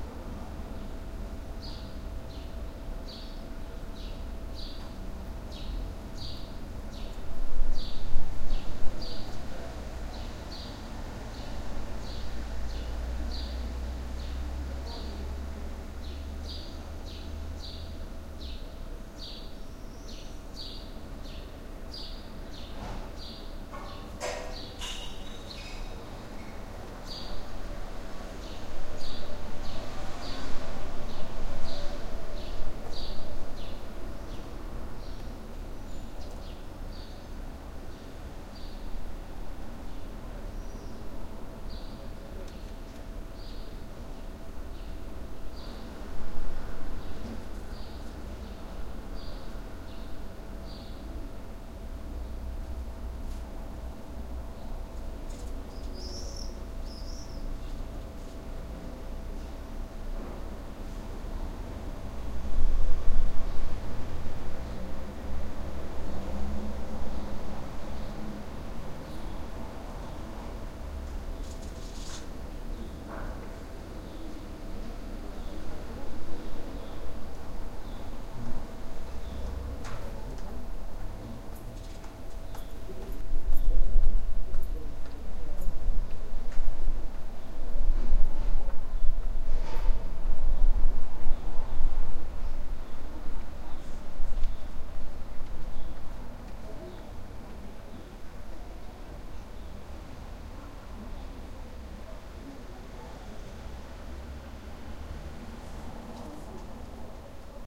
Small quiet street ambience
Small street, quiet (few vehicles, pedestrians, people talking). Someone throws glass in a recycling container at 0'23. Birds singing throughout. Recorded at 10 AM from inside a car (stopped, windows open).
birds, field-recording, cars, morning, quiet, street, footsteps